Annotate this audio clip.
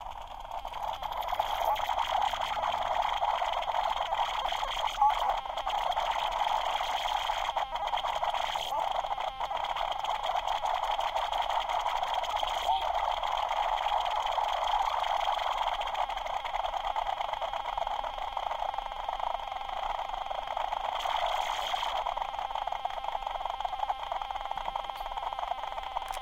Broken Toy Speaker
Audio of a malfunctioning speaker on a children's toy. Recorded with a Zoom H4. Gain increased by 10 dB. No other effects added.
broken, broken-toy, distorted, distorted-speaker, distorted-toy, distortion, error, glitch, malfunctioning, noise, scratchy, screech, speaker, squeal, toy, weird